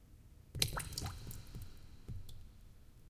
Dripping water, with reverb.